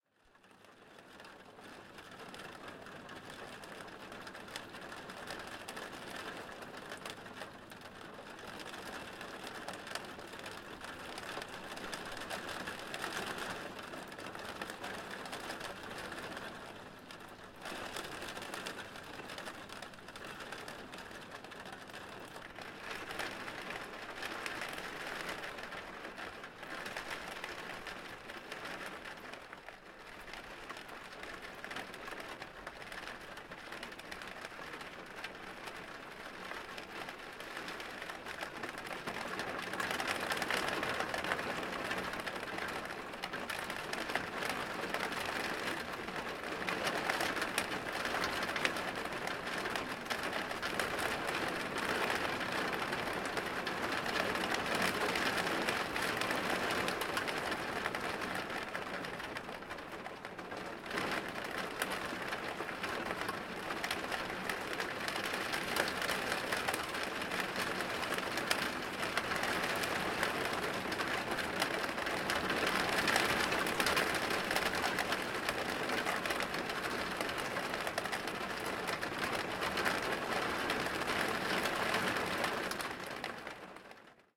Rain Hitting Window [1]
Rain hitting the window.
(I’m a student and would love to upgrade my audio gear, so if you like/download any of my audio then that would be greatly appreciated! No worries if not).
Looking for more audio?
field-recording, weather, nature, rain, rain-hitting-window, pouring-rain